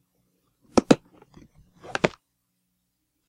Opening Case
Opening a gun box.
Recorded with a Samson M10 Microphone through a MobilePre USB Preamp|audio interface, by M-AUDIO.
box, case, gun